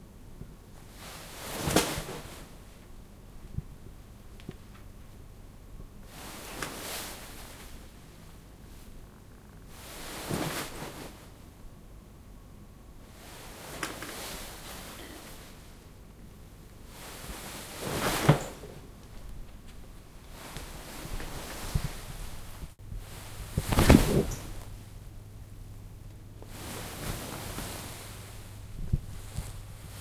Dress in chair
Flopping into chair in a satin dress
chair, dress, furniture, motion, movement, moving